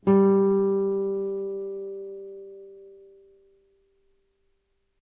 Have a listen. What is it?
G open string
open G string on a nylon strung guitar.